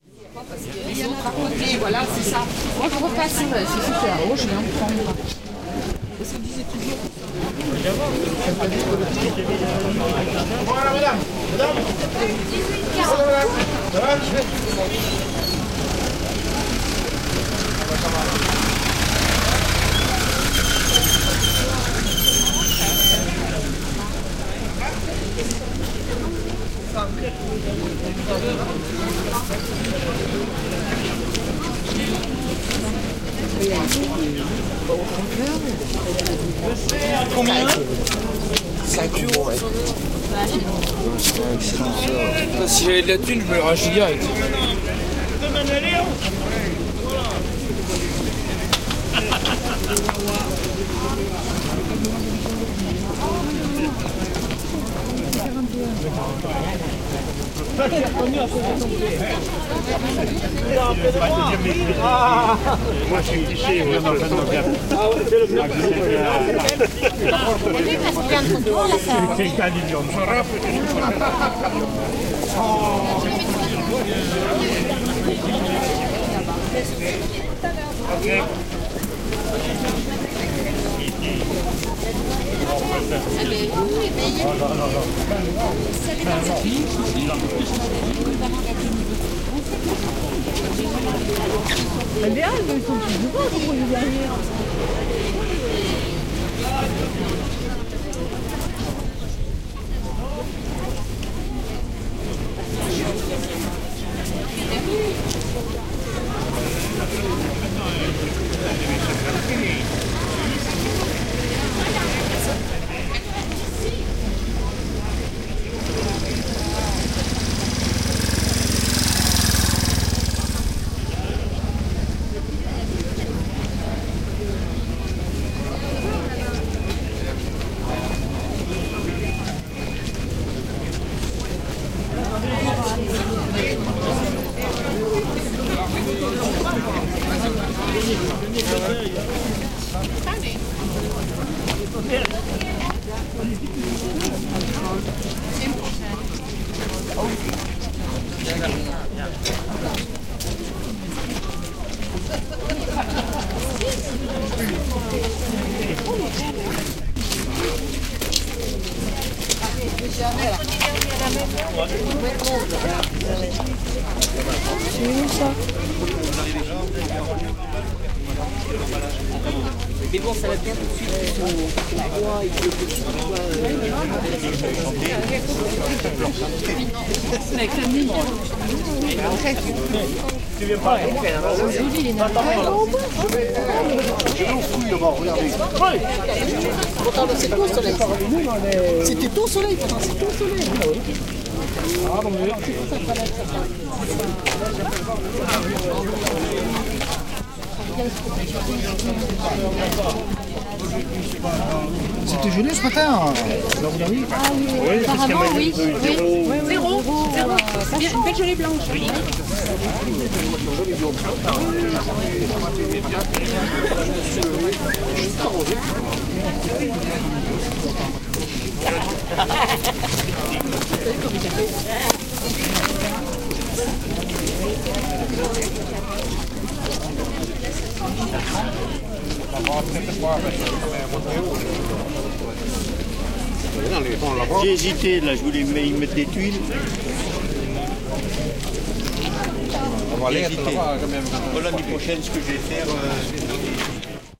A walk-through on the weekly market of Piégut-Pluviers (F) on Wednesdag 16 May 2012. People talking in French, Dutch, Engliush.